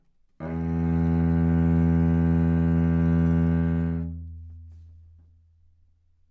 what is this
overall quality of single note - cello - E2
Part of the Good-sounds dataset of monophonic instrumental sounds.
instrument::cello
note::E
octave::2
midi note::28
good-sounds-id::1944